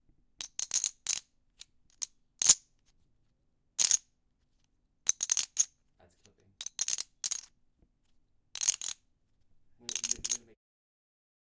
Poker chips stacking: clay on clay, poker chip stacking, clay chips falling onto each other. Sharp sound, slight reverb. Recorded with Zoom H4n recorder on an afternoon in Centurion South Africa, and was recorded as part of a Sound Design project for College. A stack of poker chips was used
casino, clay, owi, poker, poker-chips, stacking